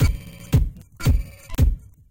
groove4 114 bpm drum loop
drum, loop